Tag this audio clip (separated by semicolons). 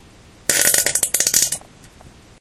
fart; flatulation; flatulence; gas; poot; weird; explosion; noise